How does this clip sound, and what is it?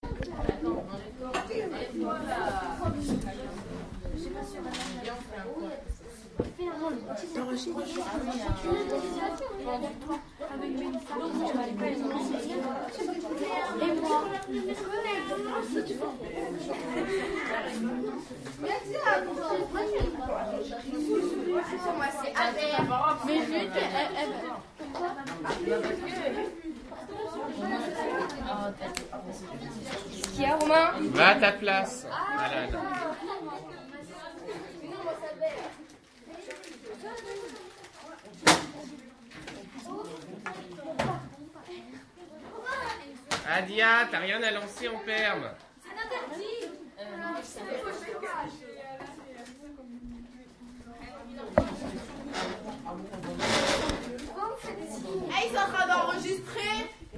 Sonic Snaps LABR Leslia,Maurine
Here are the recordings after a hunting sounds made in all the school. Trying to find the source of the sound, the place where it was recorded...
Binquenais, La, sonicsnaps